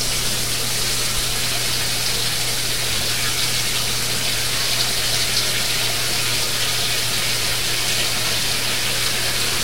This is the sound of a fairly new washer filling with water. Ambient shaping of the sound makes it apparent the dryer is in a small room. A running water sound.
Recorded on Fostex MR8 8-track recorder with Nady SP-9 Dynamic Mic.